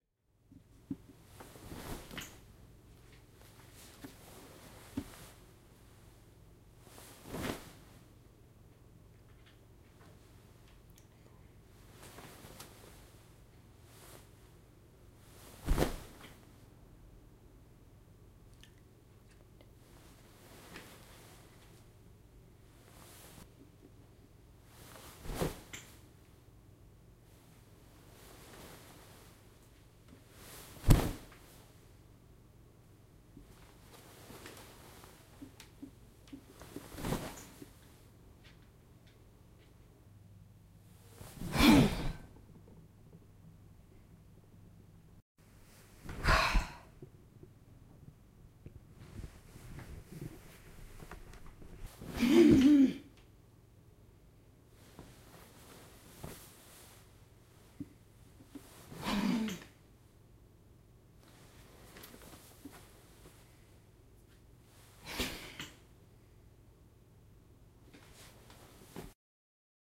Silk dress flopping down into chair
More exasperated sitting down in a chair in a silk dress.
shudder, moving, movement, dress, silk, chair